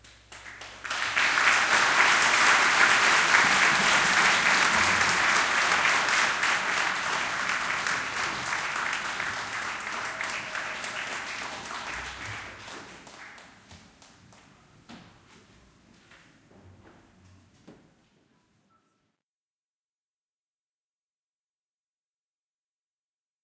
Chruch crowd applause after gospel song in Finnish church. Recorded with church microphones from it's own audio system.
applause, crowd